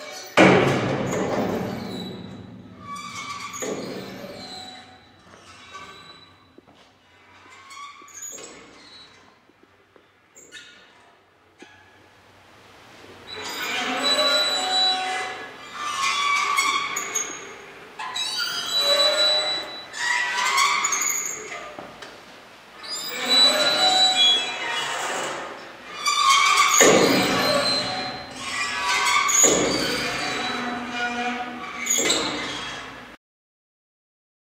CREAKY STAIRWELL DOOR
Sound of creaky stairwell gate opening and slamming closed. Recorded on a Marantz PMD661 with a shotgun mic.